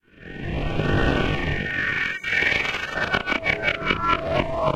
FX Reverse Grain Harsh 001
This sound has its origins many years ago and so I cannot fully remember how I made it. It was designed to emulate a flying spaceship and was used in that context in a project.